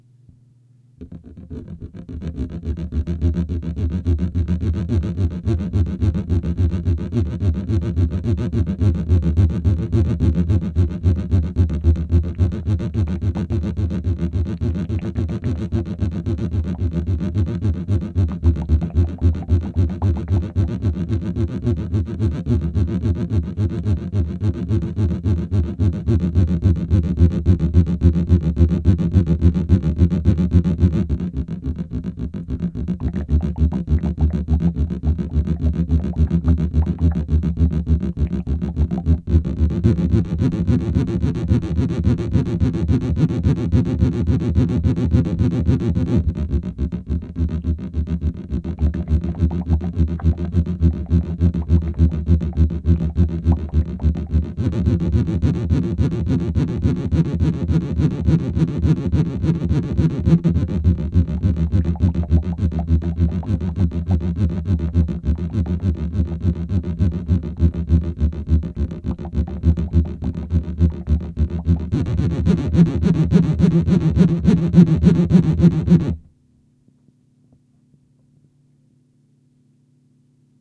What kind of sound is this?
Alien message capture
Message from space captured. Language not understood. Someone sending disturbing noise.
fantasy, mystery, space